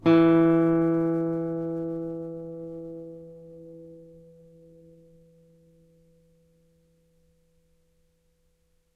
1 octave e, on a nylon strung guitar. belongs to samplepack "Notes on nylon guitar".